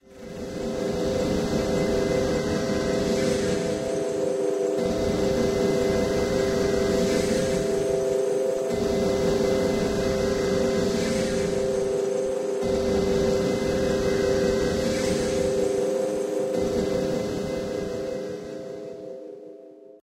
Mecha - Effects - Sequences - Ambience 06
metal, noise, ambience, industrial, drone, transformers, futuristic, fx, creature, mecha, mechanism, SciFi, sci-fi, soundeffect, mechanical, glitch, future, machine, motor, factory, effect, industry, android, robot, engine, movement, electric, space, sound-design, sfx